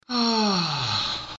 a sigh recorded on lenovo yoga 11e